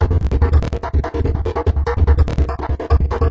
These are heavily processed beats inspired by a thread on the isratrance forum.
beats, processed, psytrance